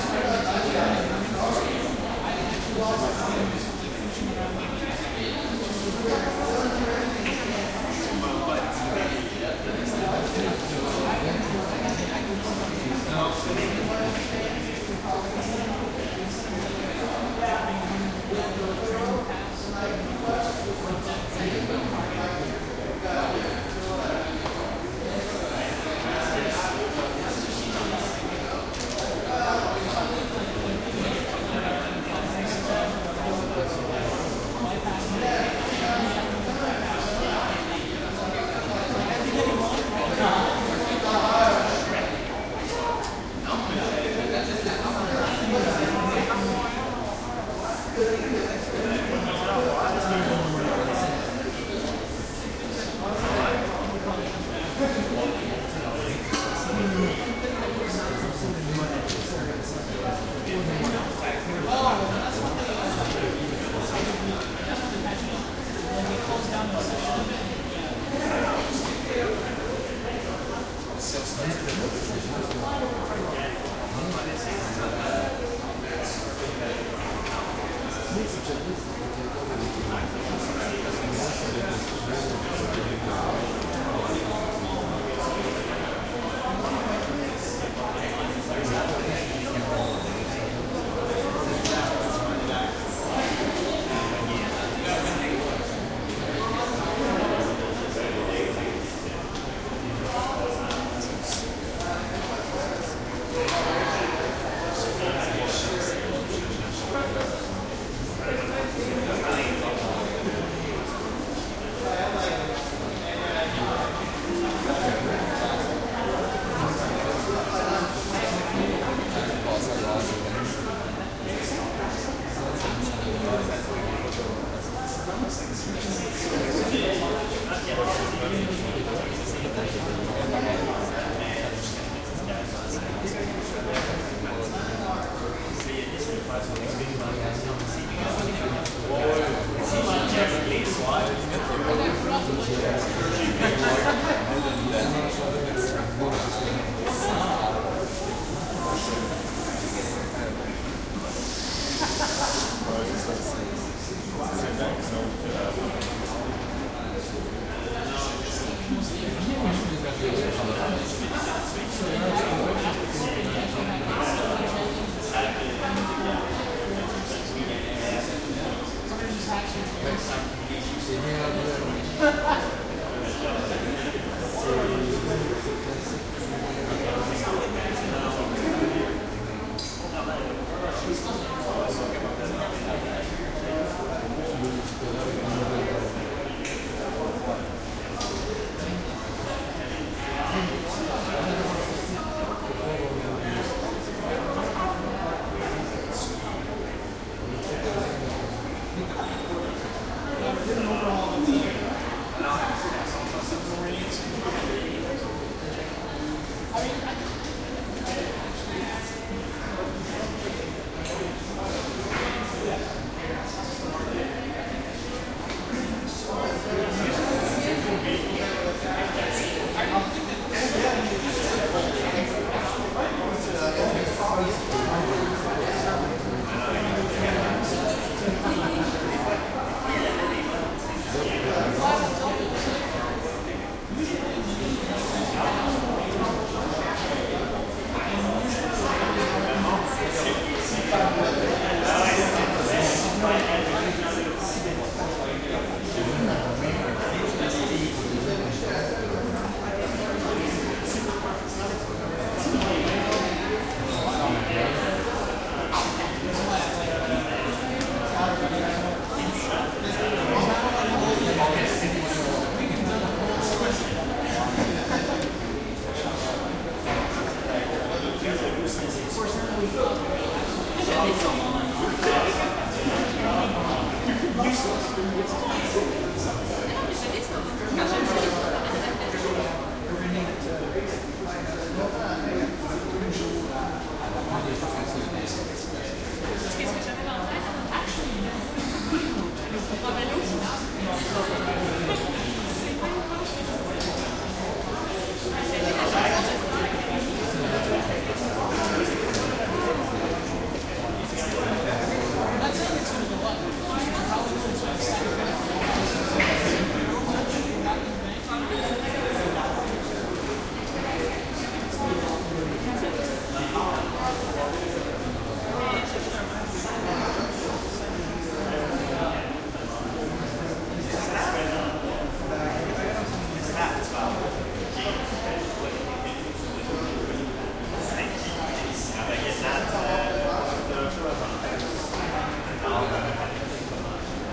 crowd int small echo hallway like school echo1
crowd, echo, hallway, int, like, school, small